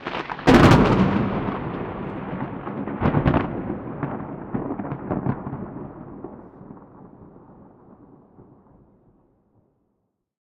balfron thunder A
Field-recording Thunder London England.
21st floor of balfron tower easter 2011
England, Field-recording, London, Thunder